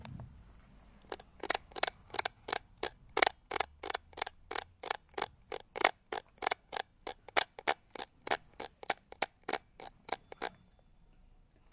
mySound Regenboog Myriam
Sounds from objects that are beloved to the participant pupils at the Regenboog school, Sint-Jans-Molenbeek in Brussels, Belgium. The source of the sounds has to be guessed.
Belgium, Molenbeek, Sint, Jans, Regenboog, Brussels, mySound